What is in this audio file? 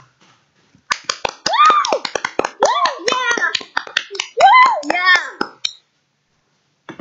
You know that awkward moment when only your Mum is clapping, I believe this perfectly captures it. Me and a friend got together and clapped and cheered into a microphone. When we listened to it back I realized how empty it sounded... And then it hit me! This is the perfect mum clap!
applause, clap, adults, cheer